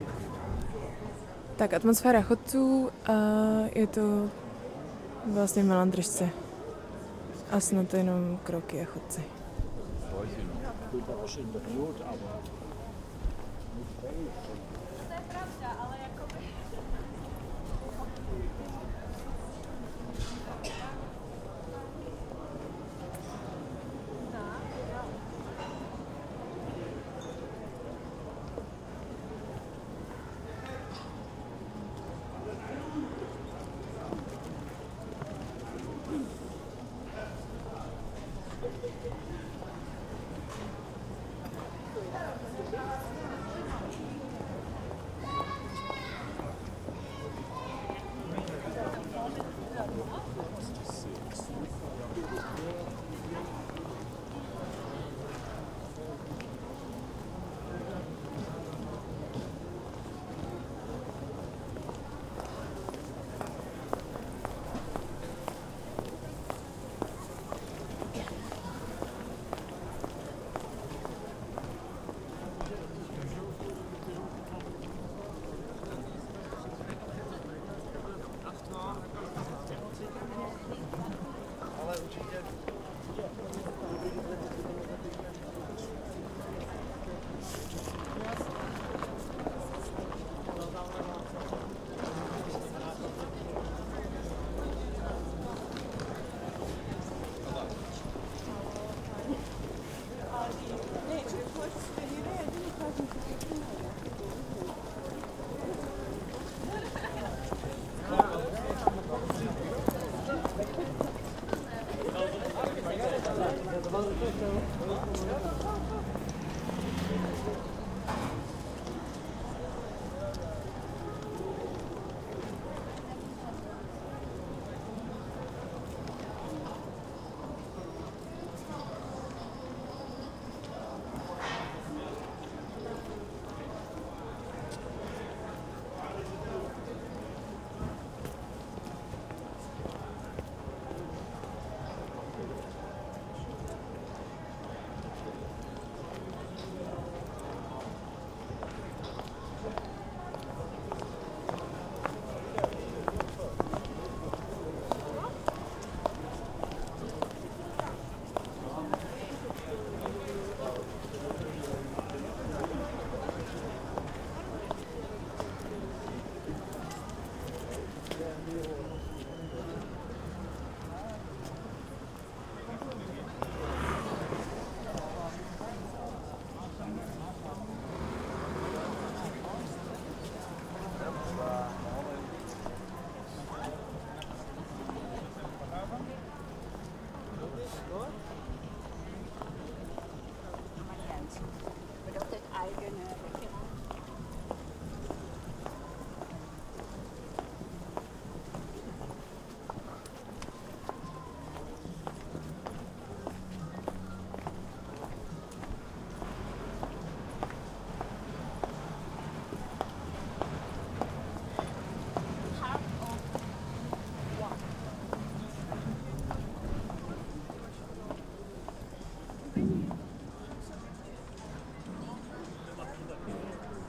Street with people walking around, Prague center
Tascam DR-70D, Sennheiser K6 ME 66 mike, mono